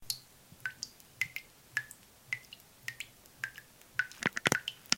Raw audio of water dripping from a tap into a small body of water. This dripping is partially slower than the others.
An example of how you might credit is by putting this in the description/credits:
And for more awesome sounds, do please check out my sound libraries or SFX store.
I've uploaded better quality versions here: